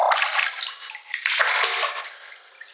Water sound collection
drip, drop, hit, splash, water, wet